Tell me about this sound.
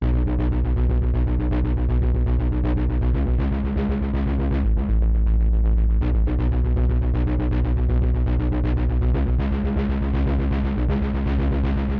Distorsion Bass
A deep sounding Bass added with a crunchy Distorsion. I´ve made it for a Track called digital -